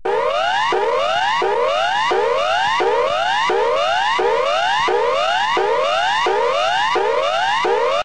Standard Emergency Warning Signal (SEWS)
The signal used in Australia in the event of a terrorist attack, natural disaster, dam failure, nuclear explosion, large bushfire, disease outbreak and hazardous materials.
emergency, disaster, sews, aus, siren, sirens, alarm, warning, alert, horn, nuclear, chime, air-raid, sound, tornado